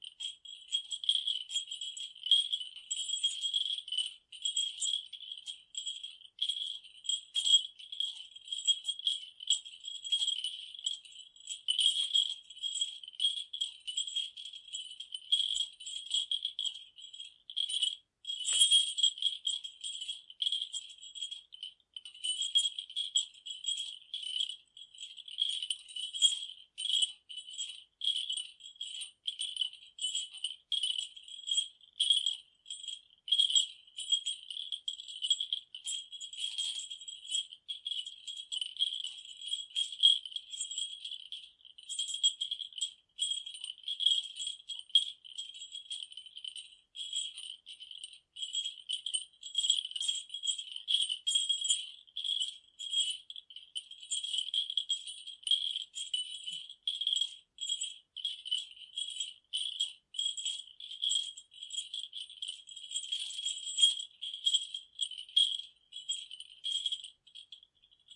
Suzu - Japanese Bell
Shake slowly left and right.
bell, japan, jingle